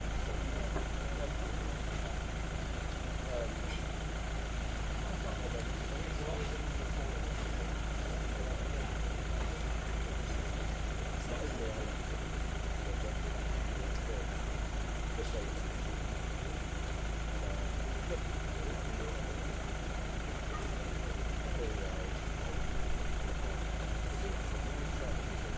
van stationary
A van is stationed at a training exercise. Some chatter in the background.
chatter, hum, vehicle